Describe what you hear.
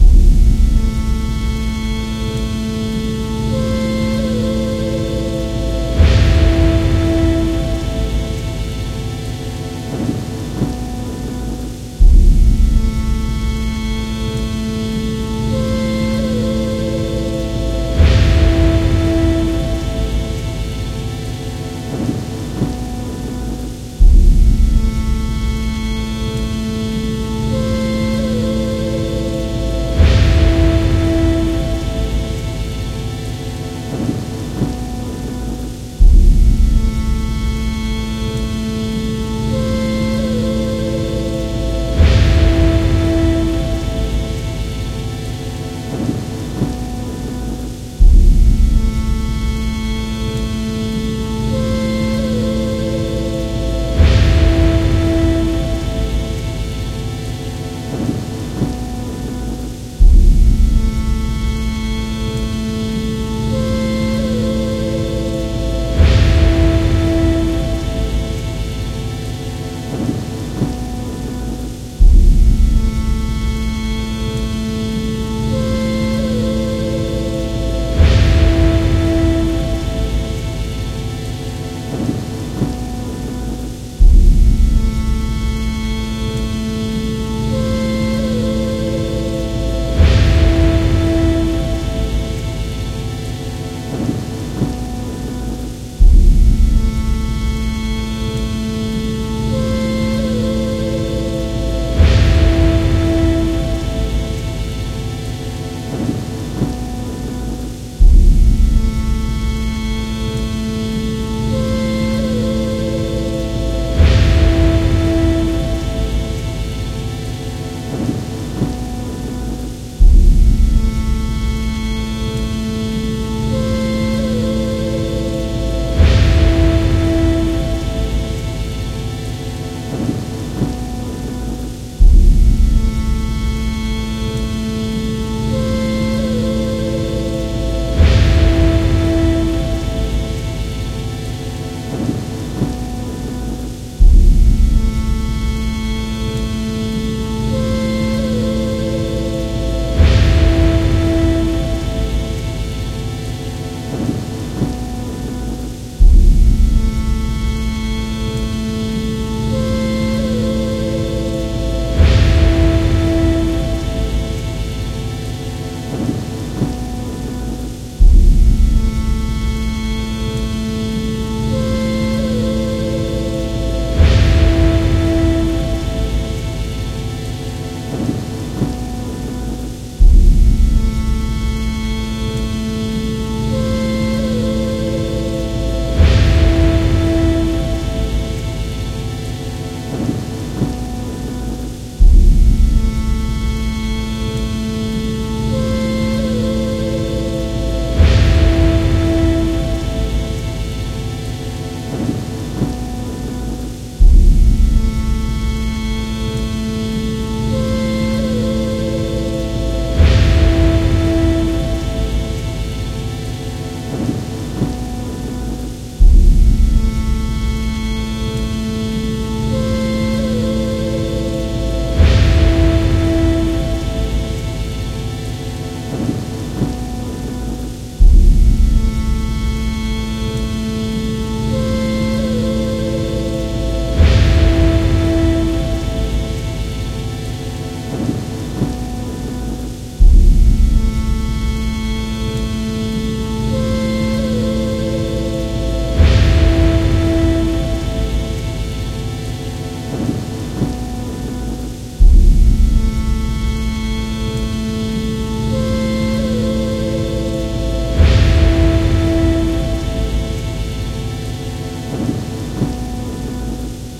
Cinemalayer rainandthunder
This sample is free for all. It's a background musical layer for puting more Feelings in your movie or game. I created this with the a Programm by MAGIX. It's royality free and good.
Cinema, Horror, Layer, Rain, Thunder